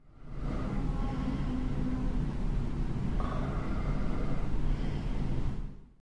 About one kilometre from my house a train is passing blowing it's horn. It's far past midnight and I am asleep but switched on my Edirol-R09 when I went to bed.
train, human, traffic, bed, street-noise, street, breath, noise, household, nature, field-recording